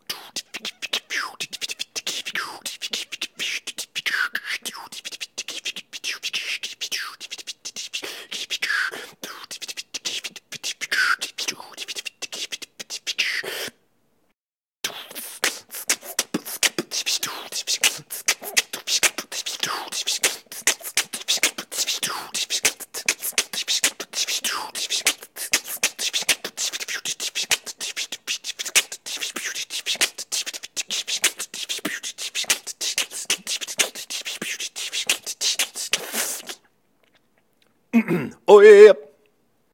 Beatboxing beat and SFX - all done with my vocals, no processing.
beatboxing, hi-hat, SFX, loop, drumset, snare, detroit, hiphop, chanting, weird, tribal, drum, human, tribalchanting, beat, beatbox, man, looping, strange, fast, male, echo, bass, loops